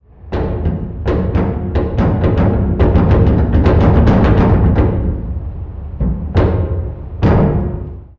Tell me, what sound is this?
RICHARD Arnaud 2014 2015 CadenasFermé
HOW I DID IT?
A record sound of a lock on a metal door.
Effects : speed (-40%) ; hight-pitched (-11,5) ; low-pitched (+3,4) ; fade in ; fade out ; reverb.
DESCRIPTION
// Typologie (Cf. Pierre Schaeffer) :
X (continu complexe) + X'' (itération complexe)
// Morphologie (Cf. Pierre Schaeffer) :
1- Masse:
Son canelé
2- Timbre harmonique:
profond, sec, métallique
3- Grain:
Rugueux
4- Allure:
Pas de vibrato
5- Dynamique :
Attaque violente
6- Profil mélodique:
Variation scalaire
7- Profil de masse
Site : impulsions du cadenas de manière aléatoires sur un fond de bruit ambiant urbain.
close, lock-up, door